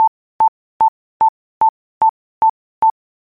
ticking bomb
clock, game, ticking, time, timeout